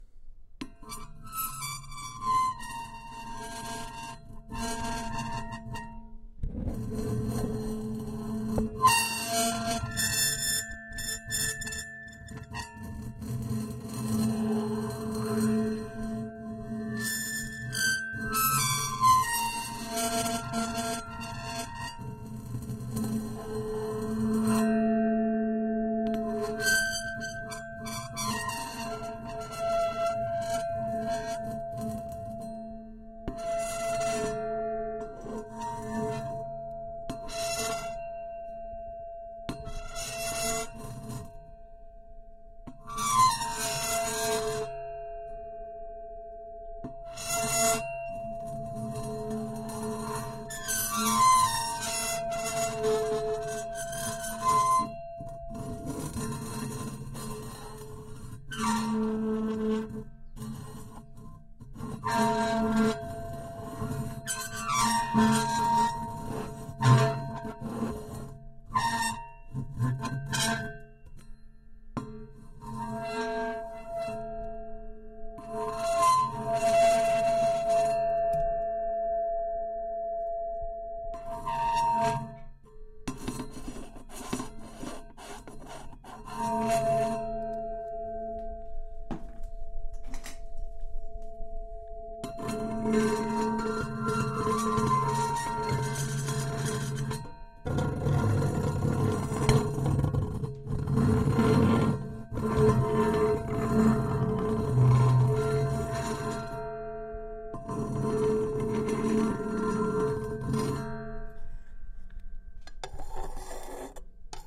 Metallic high pitched scraping
Bits of metal being scraped and cracked against various surfaces. Can be hard to listen to at times. Use in a scary movie or anywhere you need to create a disturbing, uneasy effect.